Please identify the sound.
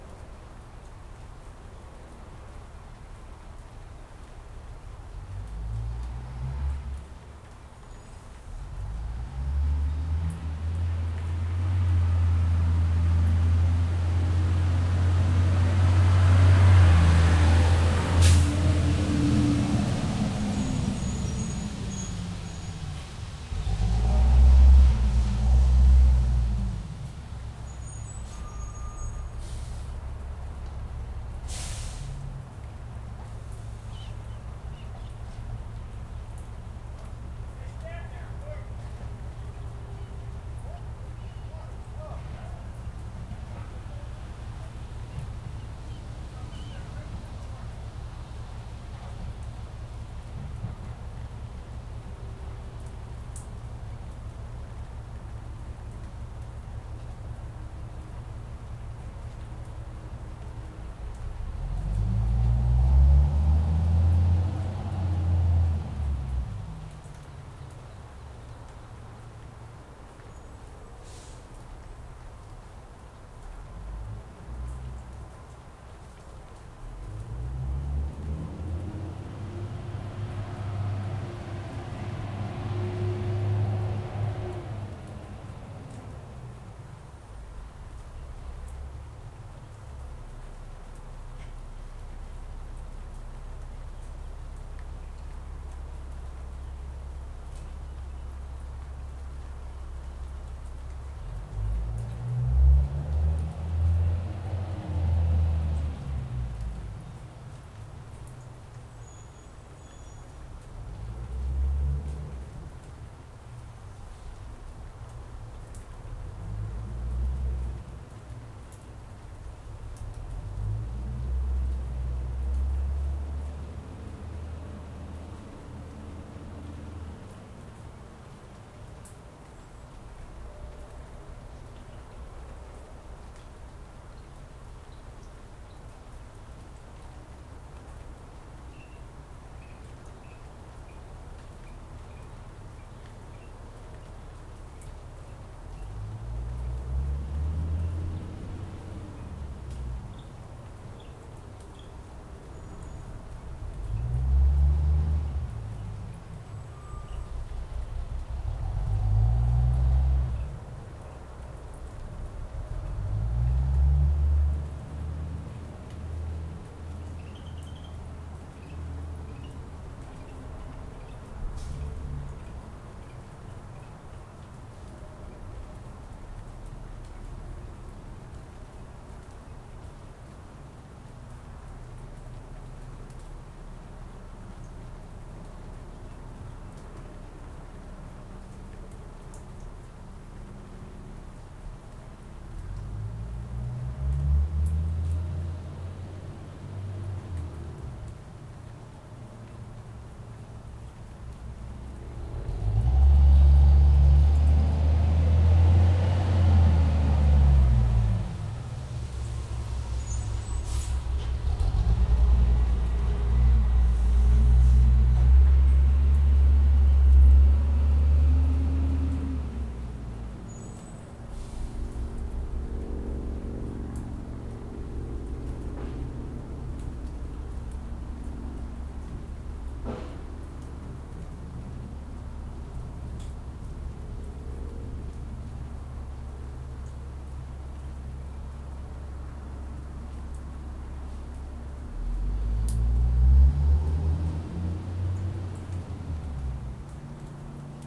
Out on the patio recording with a laptop and USB microphone. I missed the damn beep beep backup noise and the compactor door.
garbage, atmosphere, outdoor, patio, truck, field-recording, trash